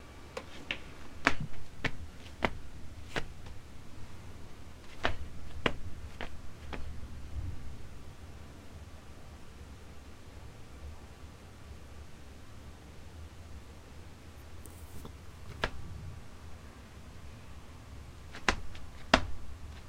Grabado en UIO pasos para escenas, grabado con mic omni audix tm1
Foley, Microphone, UIo, SFX